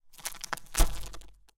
open snack bag
Opening of a snack bag, Recorded w/ m-audio NOVA condenser microphone.
doritos, open, bag, snack, chips, envoltura, papas